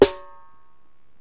Rim shot low snap 1
another rim shot on my snare with snap on.
snare, live, hit, snap, drums, recording